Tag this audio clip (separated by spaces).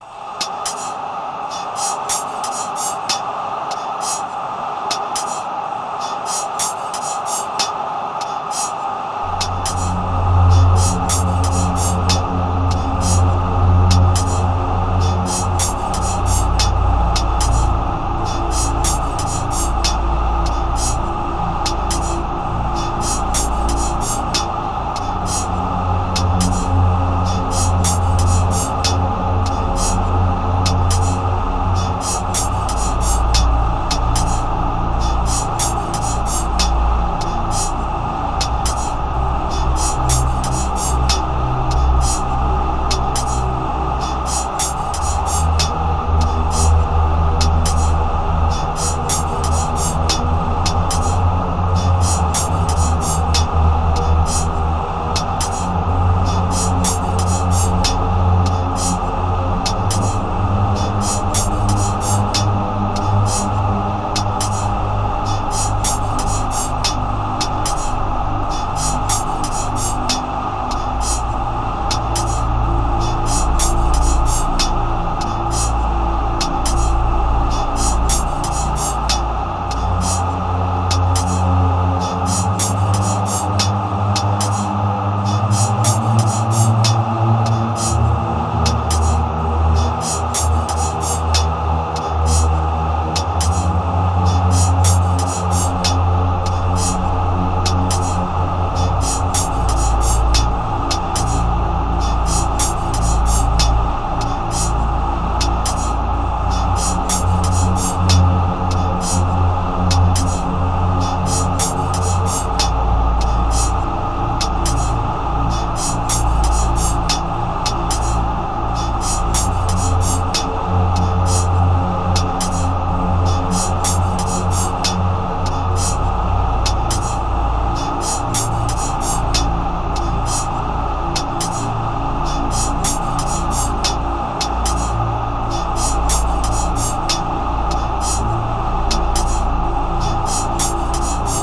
MEDIEVAL CHOIR WAR